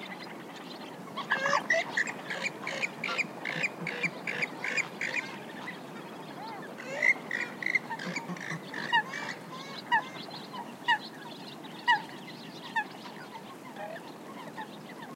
call of a coot, other birds in background. Sennheiser ME66 + AKG CK94 into Shure FP24, recorded with Edirol R09. M/S stereo decoded with Voxengo VST free plugin
field-recording, south-spain, winter, marshes, birds, coot, nature, tweet